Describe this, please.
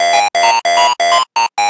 synthe string done with a damaged Korg Polysix